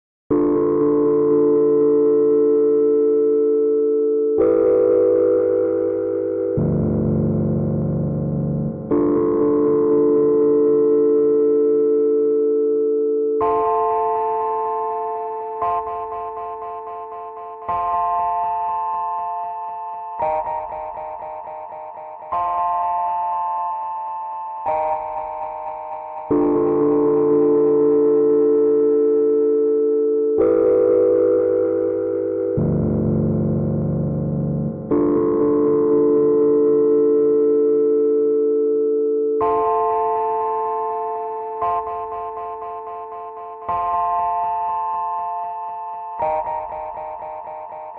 Harmonic Horror - Envy
creepy, dark, demon, doom, drama, dramatic, evil, fear, fearful, haunted, hell, intense, nightmare, paranormal, phantom, scary, spectre, spooky, strings, terrifying, thrill, thriller